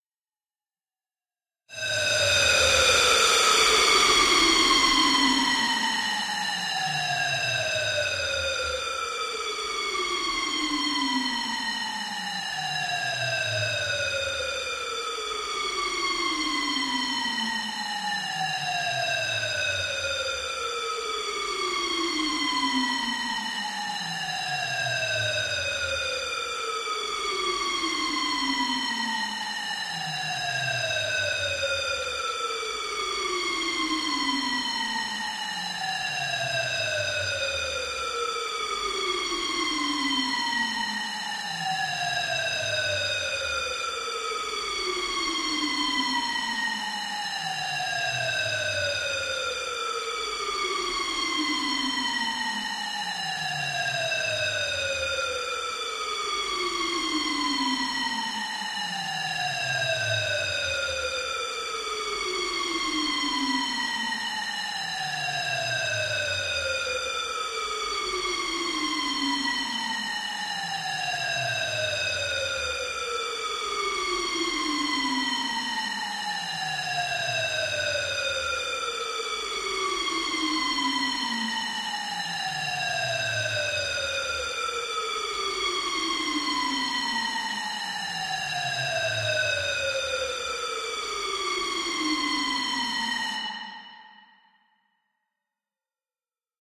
Infinite white noise down glide.